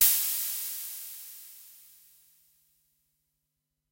MAM ADX-1 is a german made analog drumbrain with 5 parts, more akin to a Simmons/Tama drum synth than a Roland Tr-606 and the likes.
adx-1, analog, drumbrain, mam, metal, singleshot